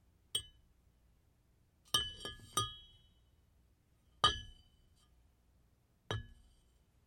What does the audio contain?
metal pipe 5
Making noise with a 2in galvanized metal pipe - cut to about 2 ft long.
Foley sound effect.
AKG condenser microphone M-Audio Delta AP
soundeffect, foley